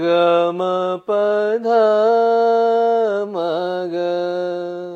Vocal-GMPDMG
This is a very short phrase for a Hindustani raag that I recorded for my audio signal processing class. The notes are far from perfect but they should be the equivalents of F3 F# G# A# F# F.
Classical, Indian, Khamaj, Raag, Vocal